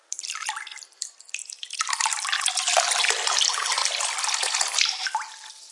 Water sound collection
hit, drip, drop, splash